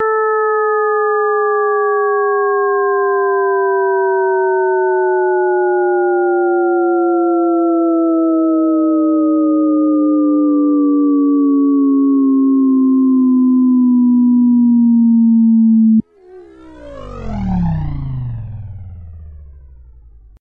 Just a tone generated with cool edit pro.
jam, noise, reverb, tone